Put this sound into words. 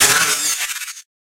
Transformer 024 Camera
Camera transformer FX made from scratch, camera sampled, then sent through stutter edit, then distorted then sent through a tremolo. various flangers etc too.